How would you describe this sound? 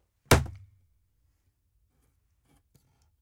bat hit against wall
bat, hit, wall